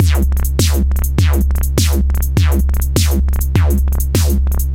TR-606 (Modified) - Series 2 - Beat 12
Beats recorded from my modified Roland TR-606 analog drummachine
Analog, Beats, Circuit-Bend, Drum, Electronic, TR-606